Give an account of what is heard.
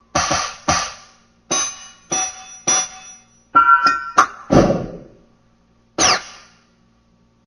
A short clip from my Roland kit. Thanks. :^)